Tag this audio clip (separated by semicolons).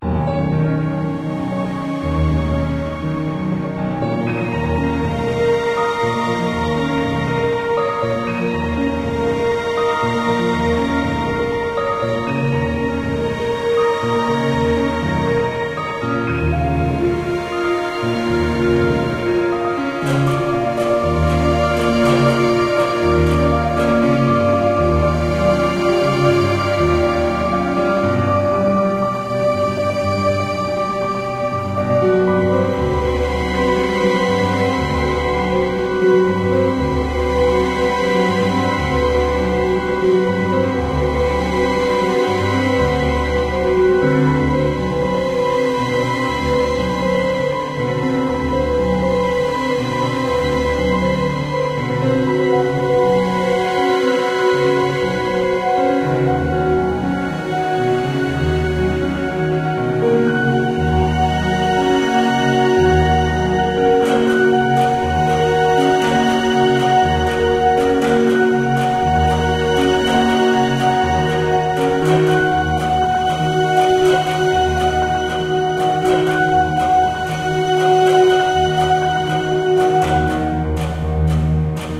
Slow; Love; Orchestral; Drama; Experimental; Orchestra; Fantasy; Music; Ambient; Sea; Film; String; Movie; Myst; Surround; Piano; Atmosphere; Cinematic; Modern; Acoustic; Score; Big